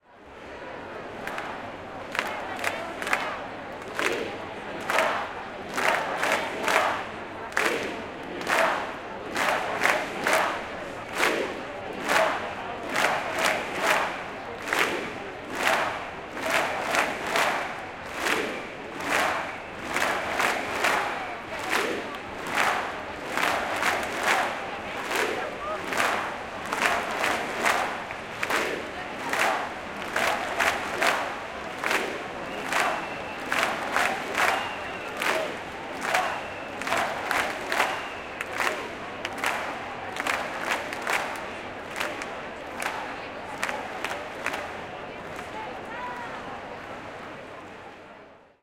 11 setembre 2021 in inde independencia 1